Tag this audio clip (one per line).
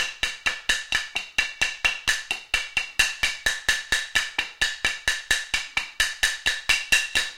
dance
drum
breakbeat
loops
board
music
beats
beam
break
lumber
industrial
ambient
garbage
metal
improvised
drums
beat
fast
130-bpm
food
groovy
hard
loop
acoustic
bottle
drum-loop
funky
cleaner
hoover
container